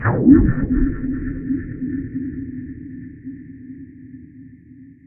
there is a long tune what i made it with absynth synthesiser and i cut it to detached sounds
ambience, ambient, atmosphere, dark, deep, digital, drone, electronic, experimental, fx, horror, noise, reverb, sample, sound-effect, space, synth